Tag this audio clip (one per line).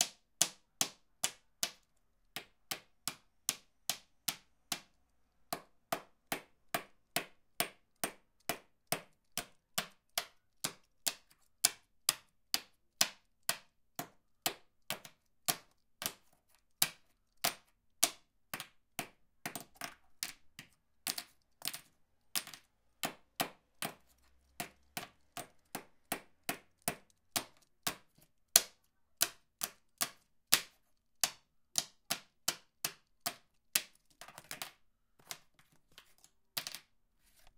hit
knock
lighter
natural
percussion
percussive
plastics
wood